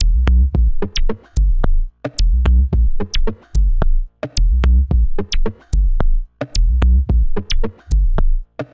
MINIMAL PERC
loop minimal beat rhythm
beat, loop, minimal, rhythm